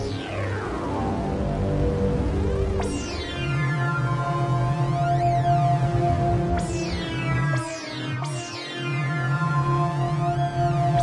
TheDarkestBeat Keys 01 - Loop04
Sinister and foreboding synth line. Swelling analog modeled synthesizer